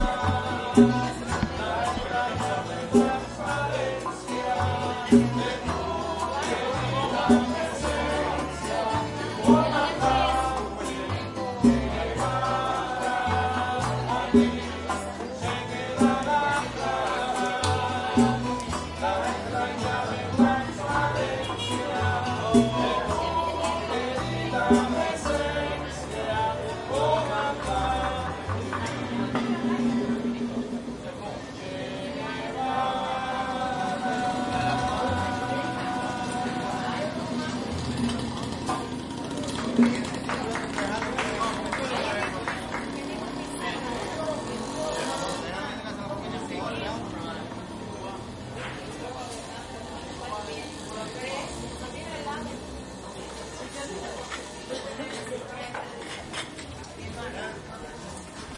Band in Brazilian Restaurant in Viñales
The sound of a band playing in a Brazilian Restaurant in Viñales - recorded from a porch across the street with all the street noise
Recorded with a Zoom H2N
cars, band, son, people, restaurant, cuba, music, Vi, field-recording, street, ales